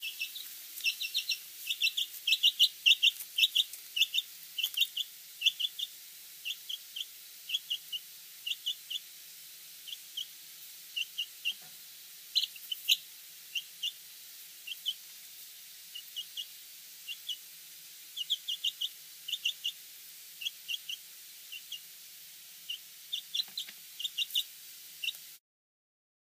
A three-week-old Black Jersey Giant chick chirping. Recorded on iPhone 4s, processed in Reaper.
baby-chicken, cheep, chick, chirp